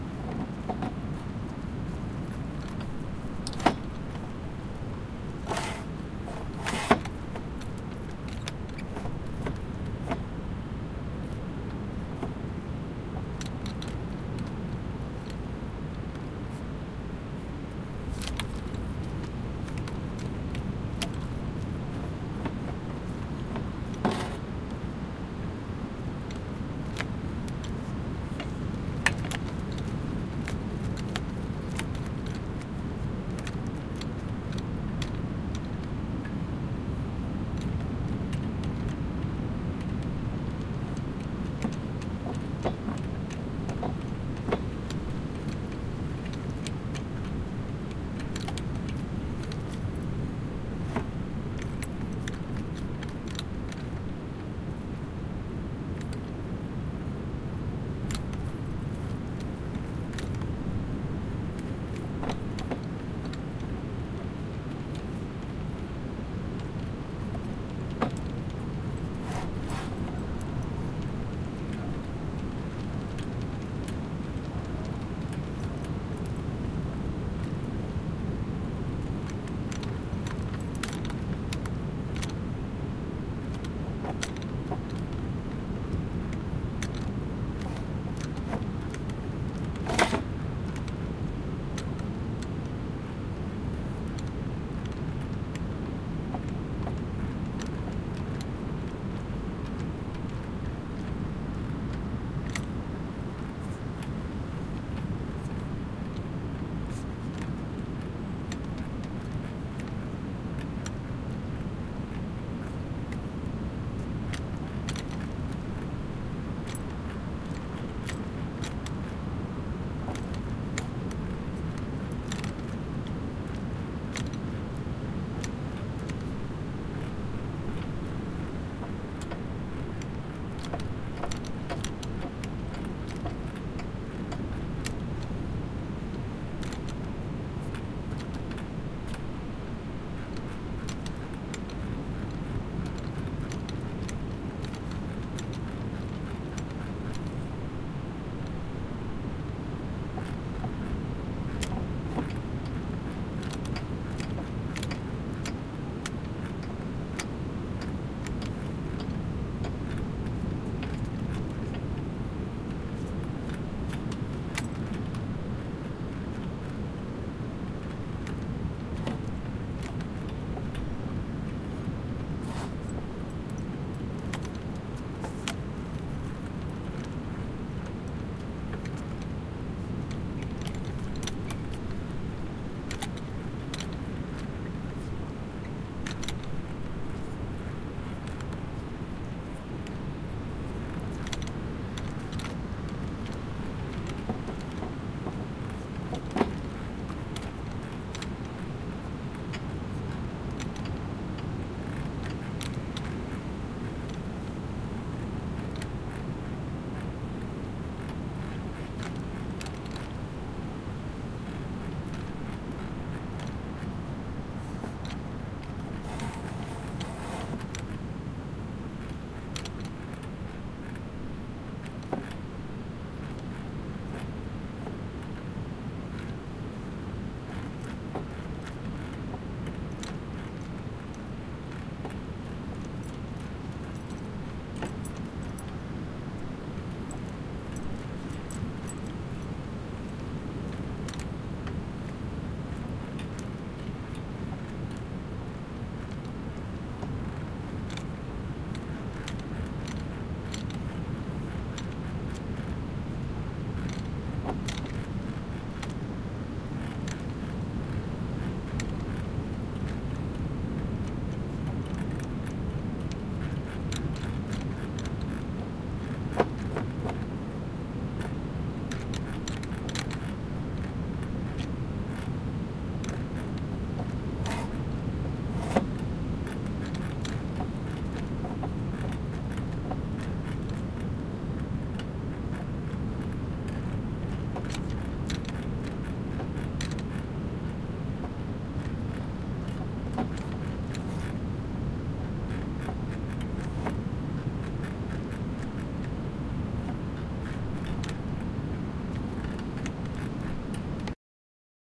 Sound of boats in the pier of Gandía in an afternoon of a day of November with calm weather. You can hear sounds of boats created with the wind with the ambient sound of mediterranean sea.